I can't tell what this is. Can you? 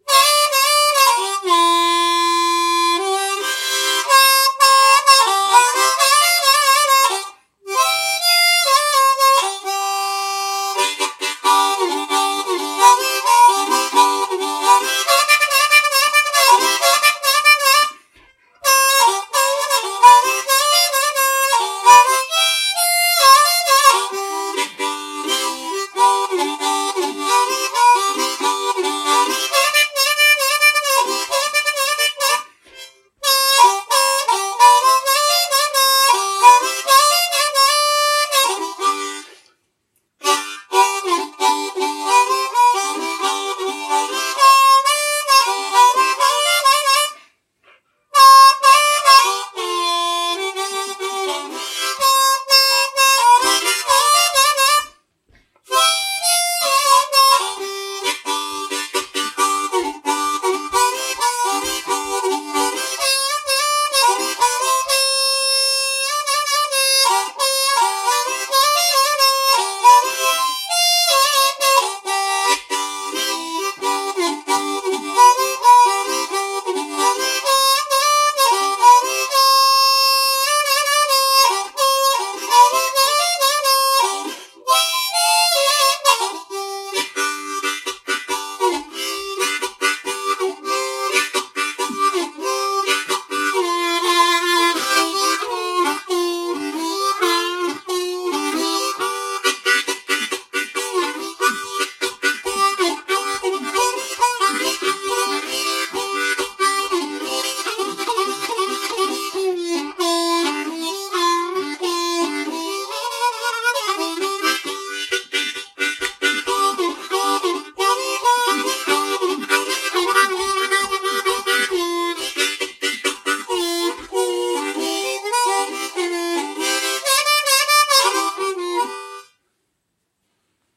Harmonica Jammin
Date: 29/9/2017
Location: Hamilton, New Zealand
Played this piece with a Marine Band Hohner in the key of C.
This was recorded using Audacity with MacBook Pro's built-in microphone.
I used Audacity's Noise reduction feature to drown out the noise in the
background.